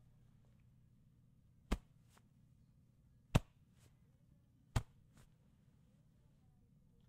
wrist grabbed
a wrist/jacket being grabbed